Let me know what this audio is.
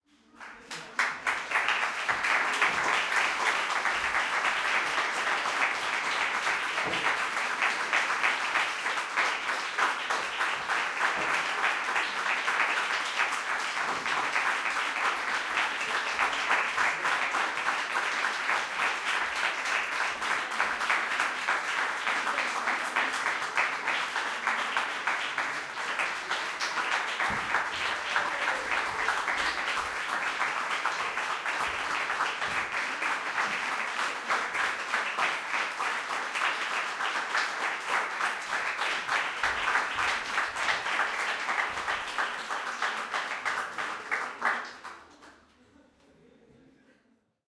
151108 - Rijeka - Istarski ok
Applause after theatre play in Istarski club, Rijeka.
ambience, aplause, applaud, applause, audience, hand-clapping